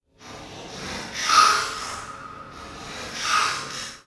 MOTTE Adrien 2017 2018 NoiseDisturbingMachine
This is a recording from a coffee machine that has been inverted and I added it a reverb (default settings from the Gverb tool of Audacity). I did a fade out too.
Typologie de Schaeffer :
C'est un enregistrement combinant des impulsions complexes (X') et du continu complexe (x).
Masse : groupe nodal
Timbre Harmonique : éclatant
Grain : rugueux
Dynamique : violente
Profil mélodique : variations glissantes
Profil de masse : calibre
abstract, disturbing, industrial, machine, noise, sci-fi, strange, weird